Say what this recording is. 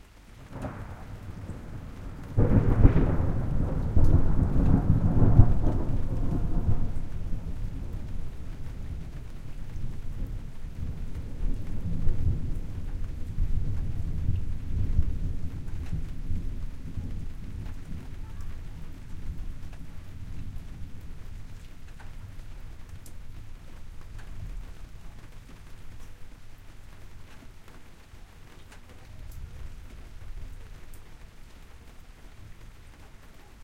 I managed to catch a thunderclap from a short rainstorm.
Mikrophones 2 OM1(line-audio)
Wind protect Röde WS8
Thunderstorm, Thunder, Rain, nature, Weather, Lightning, storm, thunder-storm, field-recording